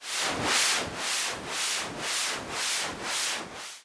Sounds like something constantly sweeping back and forth.
Created using Chiptone by clicking the randomize button.